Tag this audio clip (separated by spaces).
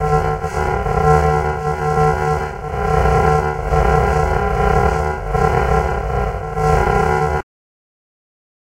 Interstellar Sound-Design Worlds